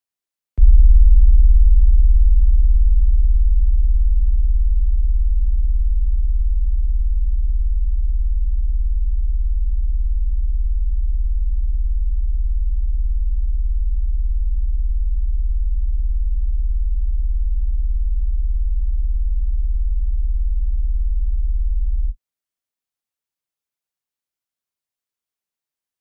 This low rumble was first created to represent an airplane.